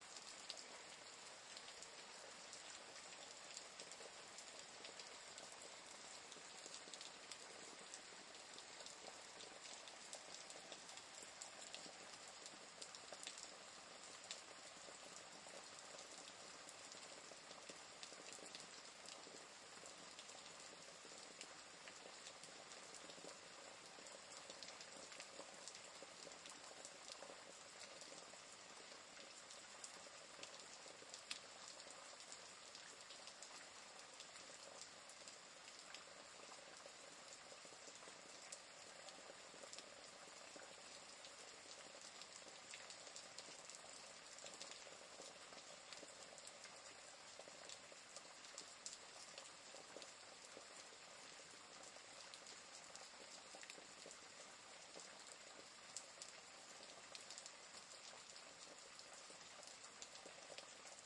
soft rain and gutter
rain soft water dripping gutter
rain, soft, water